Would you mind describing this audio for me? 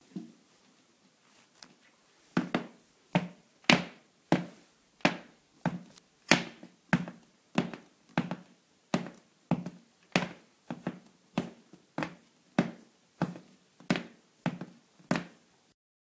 boots on floor
Walking on floor with boots
Boots, floor, heavy